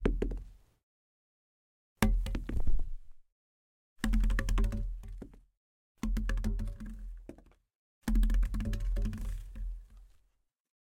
Studio recording of an apple being dropped on concrete and rolling a bit.
GEAR:
Oktava MK-012
Orion Antelope
FORMAT: